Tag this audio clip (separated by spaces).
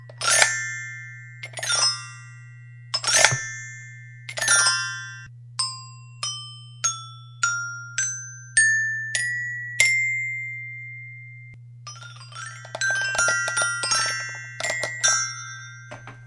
fun kids magic play whimsical xylophone